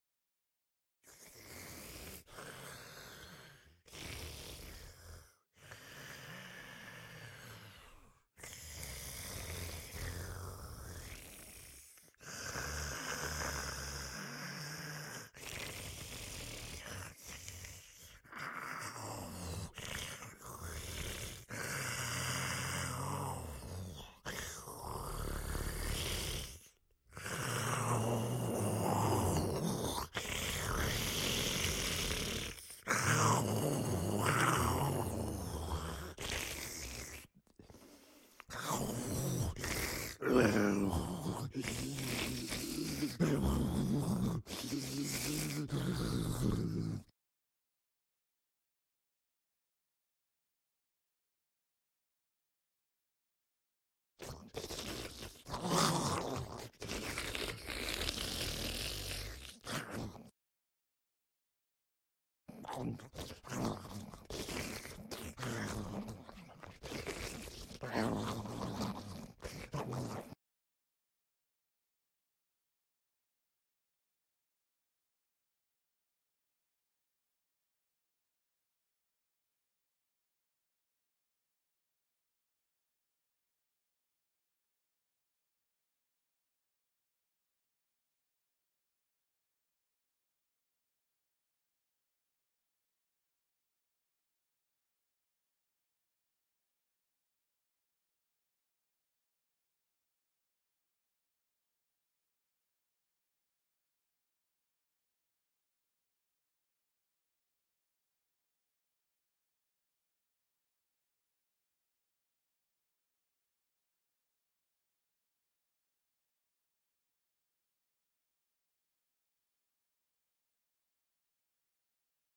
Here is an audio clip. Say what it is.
Solo Zombie 6
Single groaning zombie. Syncs at 08.24.14.
solo, horror, voice, groan, undead, monster, zombie, dead-season